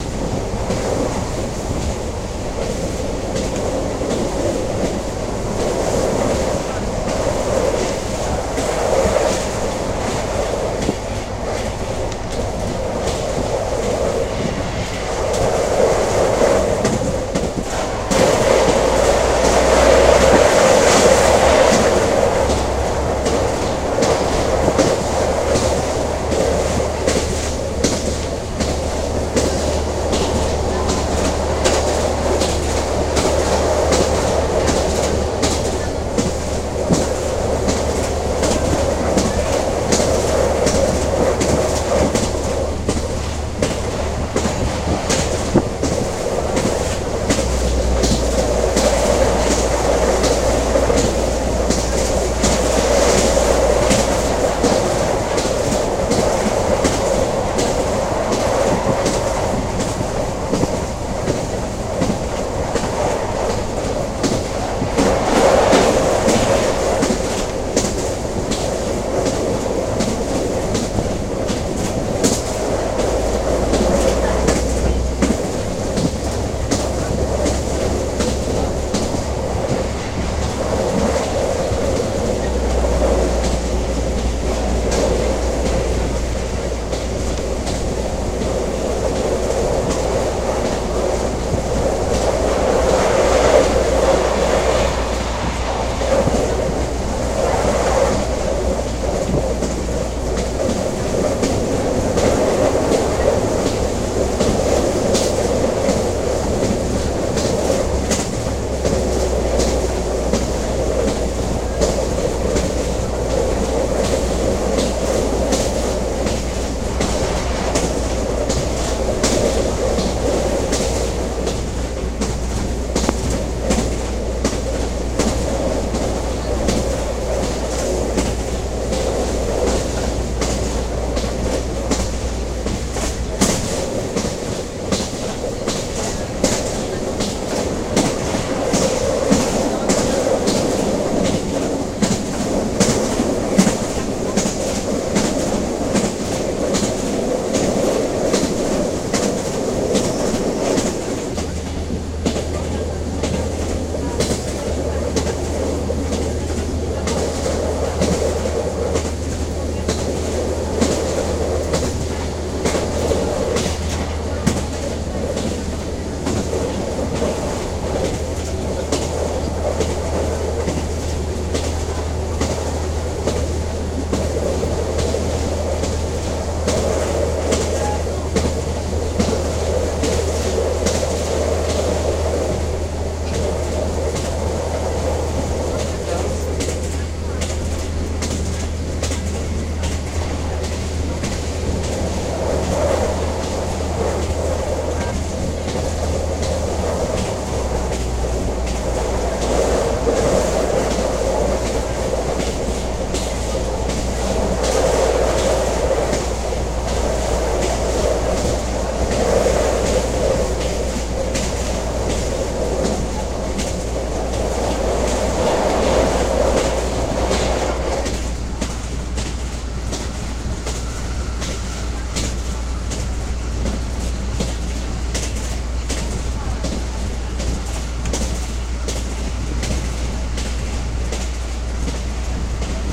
Inside a TER train in France, sometimes a few people speaking in the compartment but very discreet. Made to help sleeping ;-) Recorded with integrated microphon on a Samsung Galaxy SII.